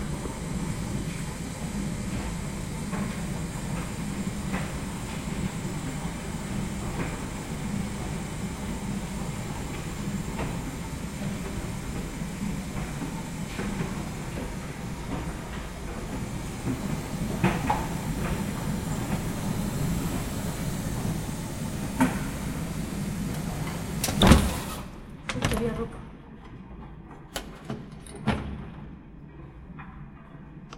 Paisaje sonoro del Campus de la Universidad Europea de Madrid.
European University of Madrid campus soundscape.
Sonido de lavadoras
washing machines sound